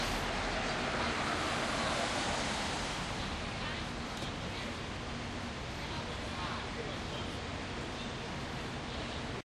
philadelphia parkwaymuseum
Down the street from Independence Hall in Philadelphia recorded with DS-40 and edited in Wavosaur.
independence-hall, field-recording, city, philadelphia